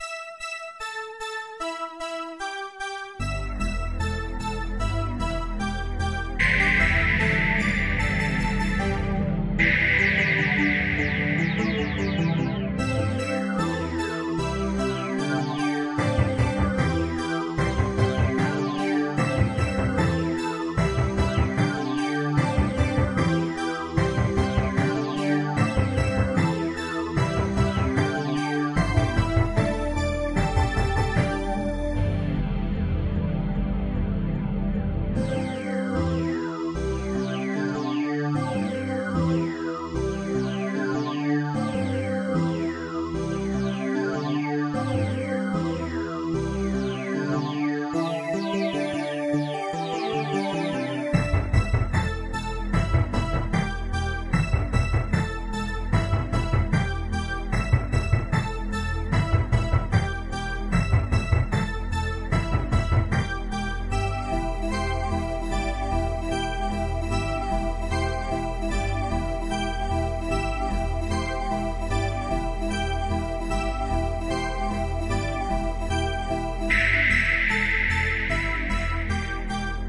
The forgotten future
ambience, ambient, background, electro, electronic, loop, melody, music, retro, sample, soundtrack, synth, synthesizer